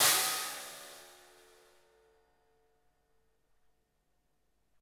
Stack3 Single
Part of "SemiloopDrumsamples" package, please dl the whole package.. With 'semilooped' I mean that only the ride and hihat are longer loops and the kick and snare is separate for better flexibility. I only made basic patterns tho as this package is mostly meant for creating custom playalong/click tracks.
No EQ's, I'll let the user do that.. again for flexibility
All samples are Stereo(48khz24bitFLAC), since the sound of the kick naturally leaks in the overheads and the overheads are a big part of the snare sound.